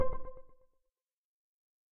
SwarajiwaTH Perc1 C5
Bell-like percussion at C5 note
bell, percussion, synth1